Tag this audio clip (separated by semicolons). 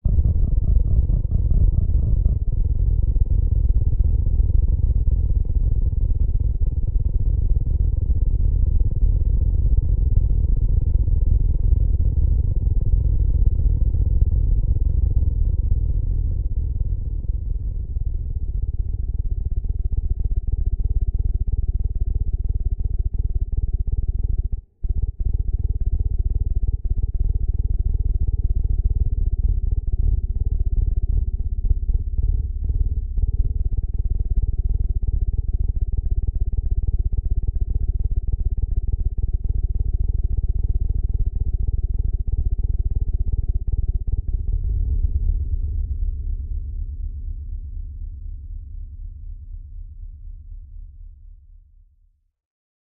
engine; plane